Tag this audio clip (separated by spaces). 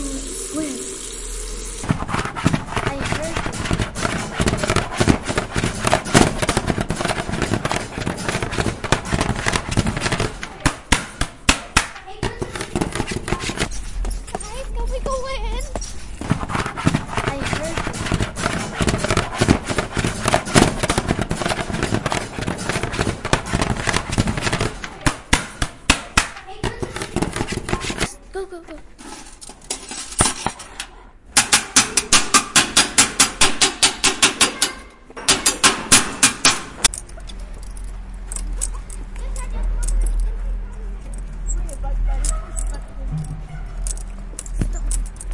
water spoon